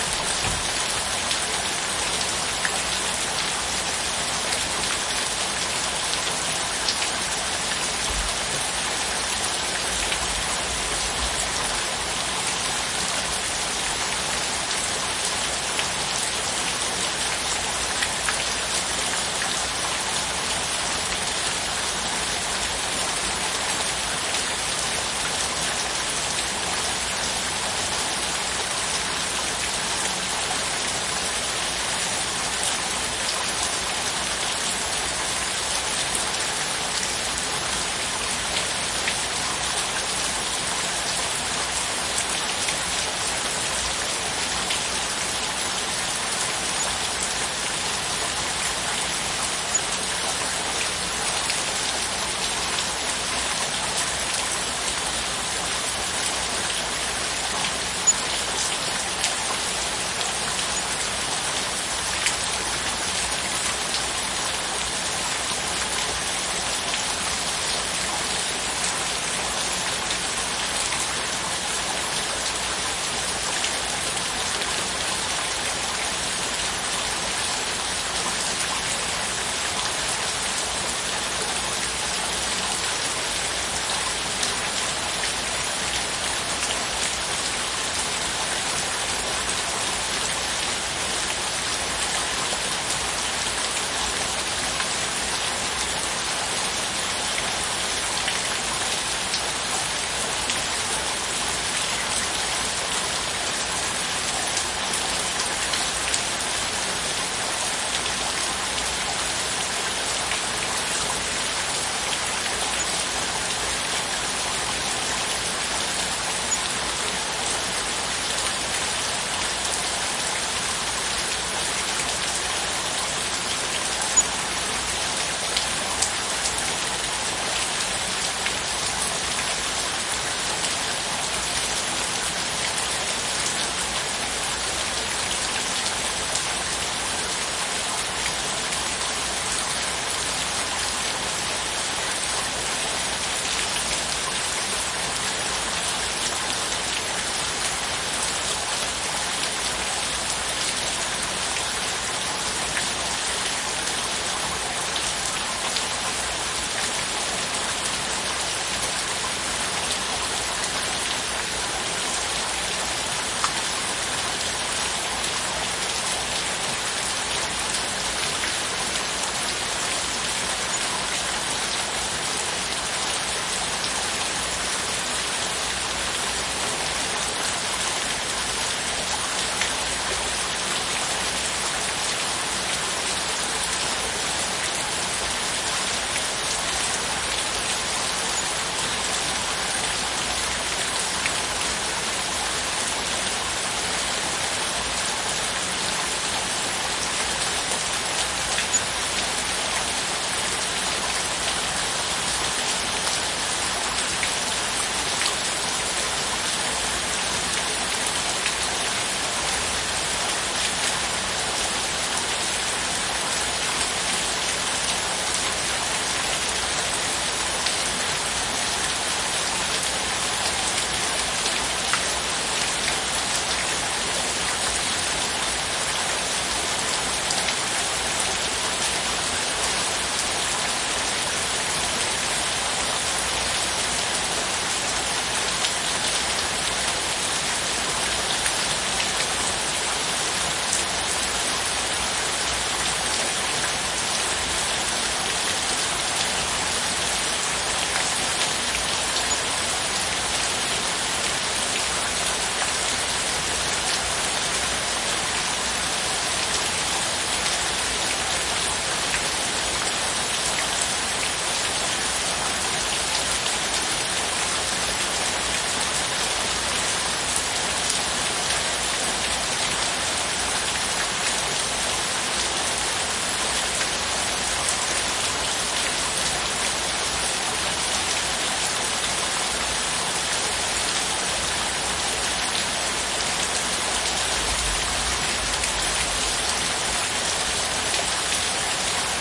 Take 1/2. Recorded in Japan, Nagano, Ina, in a traditional Japanese farmhouse in the Japanese alps. Late september 2016. Heavy rain occurred because of typhoons in the area. I made various takes with distinct sounds of rain falling on and around the house. Recorder with Zoom H2n in Stereo.